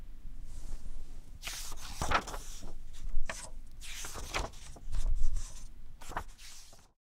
Flipping book
Sennheiser ME40. Zoom H6. Location: NSCAD University library. Halifax, NS. Canada.